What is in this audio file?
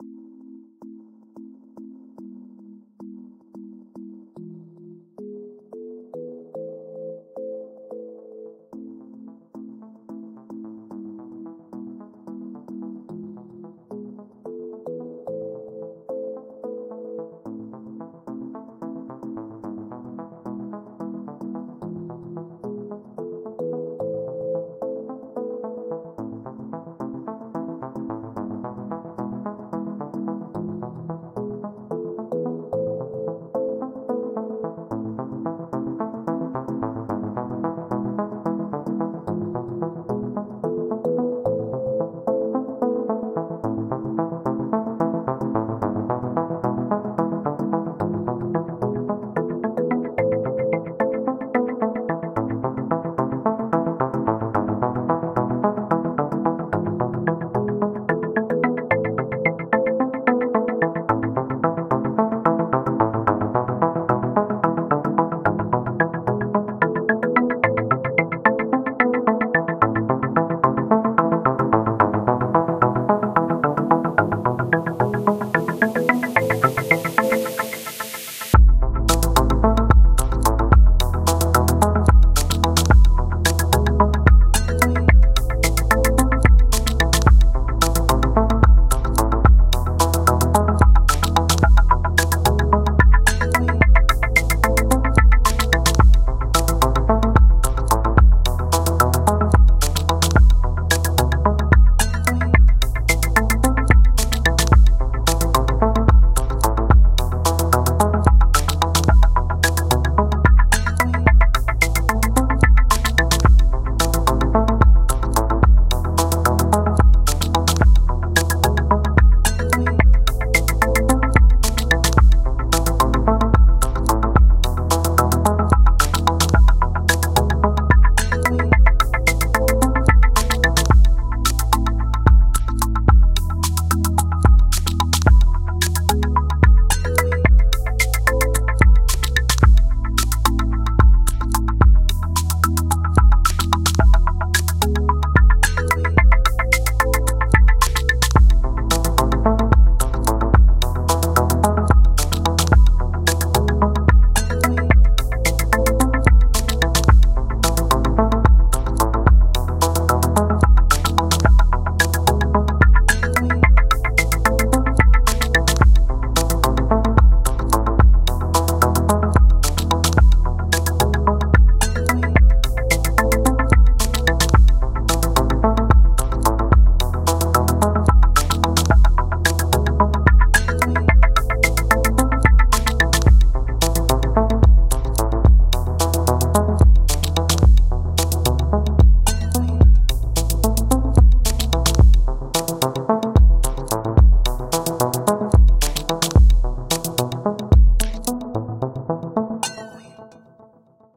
Here is a cool futuristic sounding rhythmic ambience for various use in games and movies. There are also opportunities for looping the track.